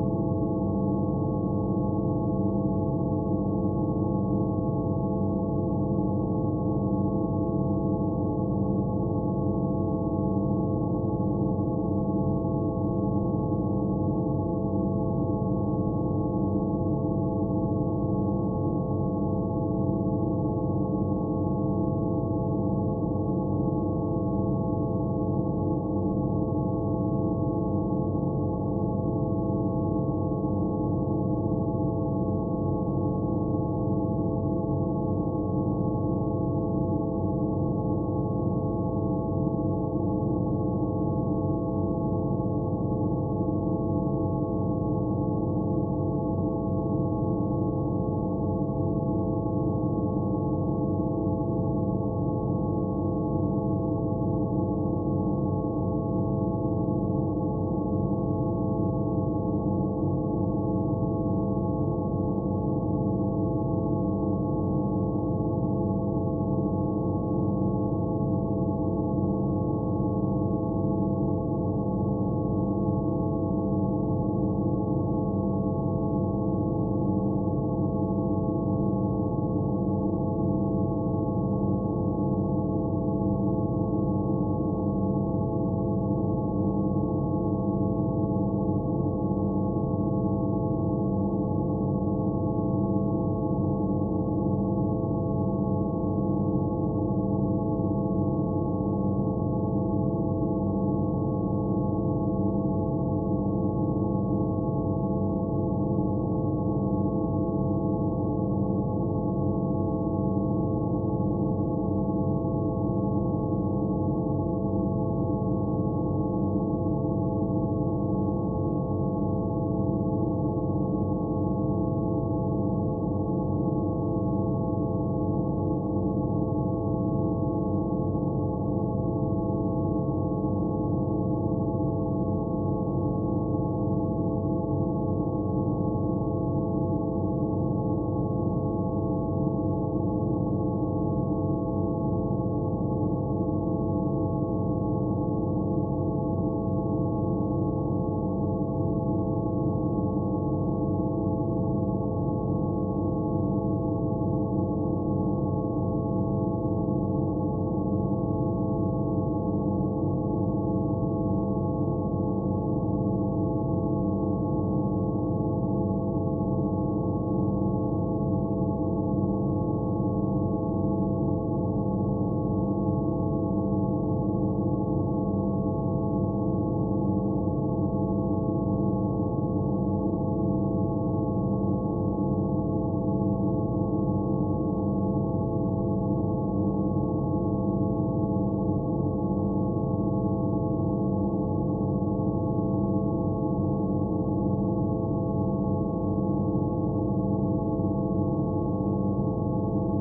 BeeOne Loop 20130528-143208
Mad Loop made with our BeeOne software.
For Attributon use: "made with HSE BeeOne"
Request more specific loops (PM or e-mail)
electronic, ambient, experimental, background, loop